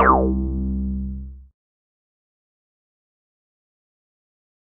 Sample06 (Acid303 B3-8-9-16)
A acid one-shot sound sample created by remixing the sounds of
synth, one-shot, tb, acid, 303